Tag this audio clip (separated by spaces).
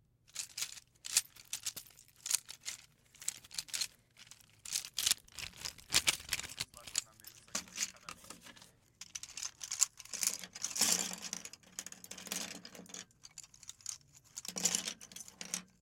4maudio17 case pencil shaking uam